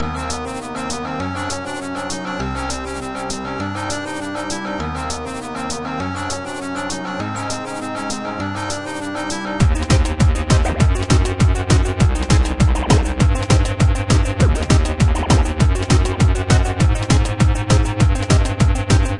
atrap par le col
2 in 1 loop : first part : disturbing and ugly melody and second part : chiptune hardtechno (ugly too !)
180bpm, techno